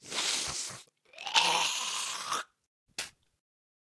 delphiz SPITTING LOOP #120
This Spitting loop sounds a kind of dirty but is very good for making effects. Use them with effects like, delay, reverb, bandpass filters. Or use it backwards in reverse